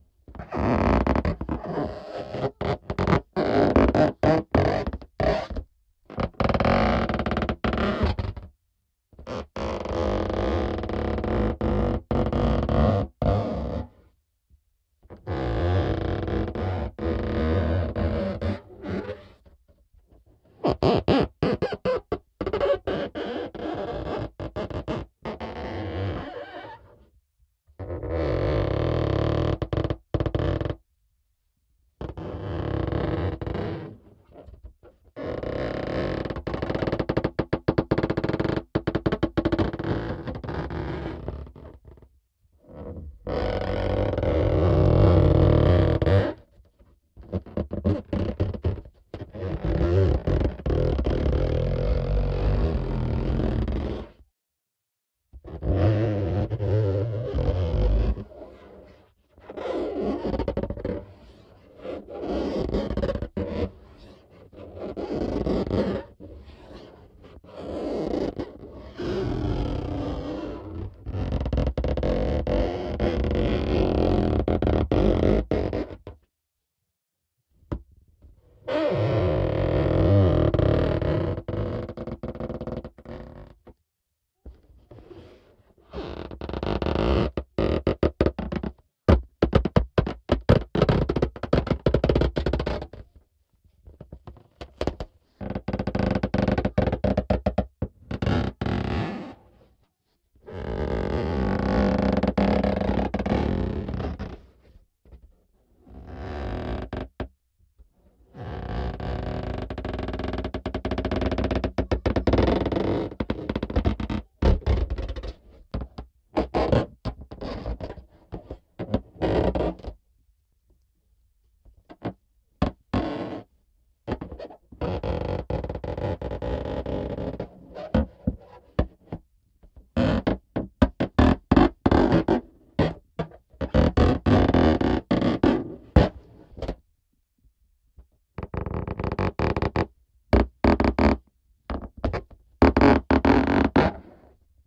balloon movement sounds
balloon sounds that were created by moving and squeezing a rubber balloon.
Piezo->Piezo Buffer->PCM M10.
bag, balloon, flexible, handling, movement, noise, noises, piezo, recording, rub, rubber, rubbing, squeak, squeaks, strain, tension